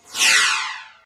This sound has been created by apply flanger to a recording in Audacity.